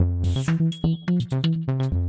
Groove FM8 F 125BPM
125bpm, bas, loop